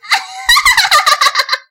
dat evil laugh
a pinkie pie-esque evil laugh